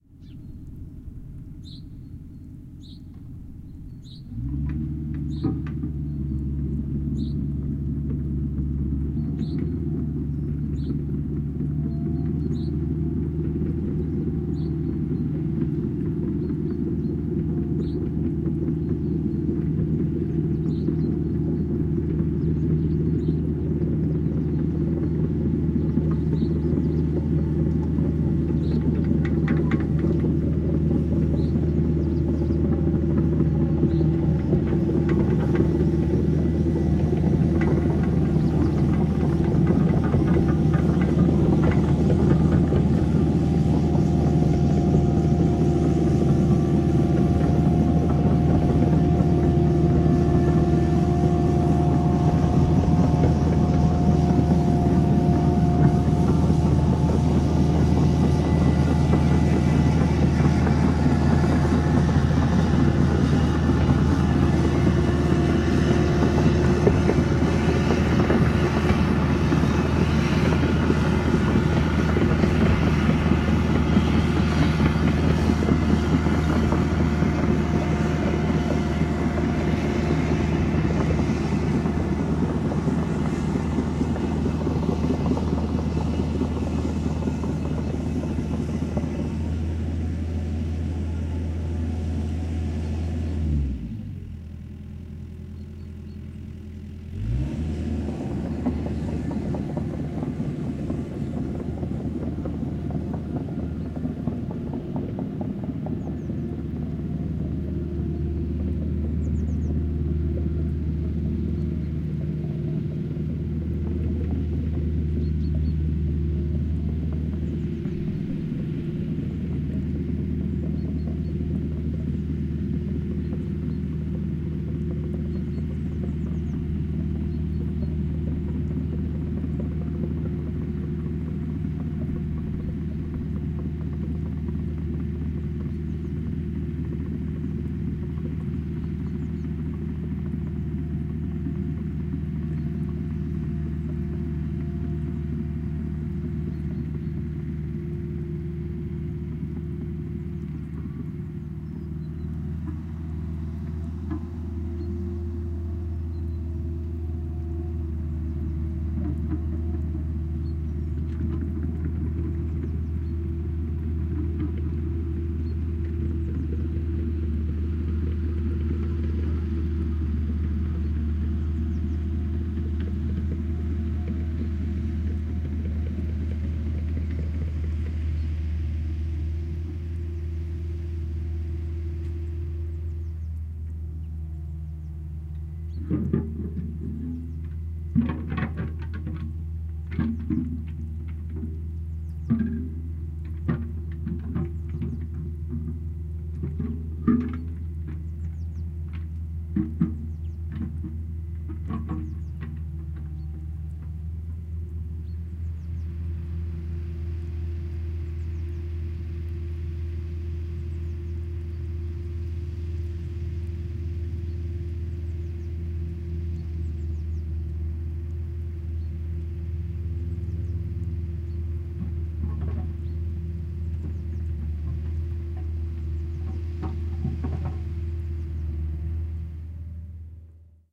Excavator Right To Left Long
A stereo field-recording of a steel tracked 6 ton excavator traveling from right to left across grassland. Rode NT-4 > Fel battery pre-amp > Zoom H2 line-in.
clank, clunk, diesel, digger, excavator, field-recording, machine, machinery, stereo, tracks, xy